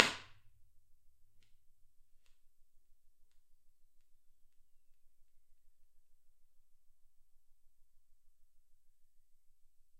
Finnvox Impulses - B Room SSL Listen Mic
studios, response, ir, impulse, Finnvox, reverb, convolution